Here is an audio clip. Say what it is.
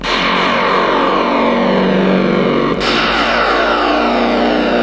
alien atmos dark
Retro space invaders game sound
alien, dark, electro, retro, scary, sci-fi, scifi, space-invaders, video-game, weird